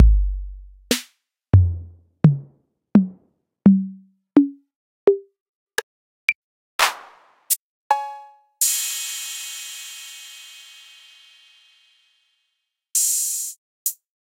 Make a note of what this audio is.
808 drum kit emulation
Analog, Drum, Kit